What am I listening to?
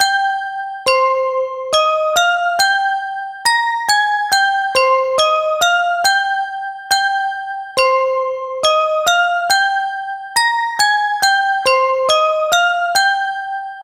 trap bell loop
one of my favorite bell loops i've made.
139bpm in the scale of c natural minor
loop, 139bpm, bell, hip-hop, trap, bells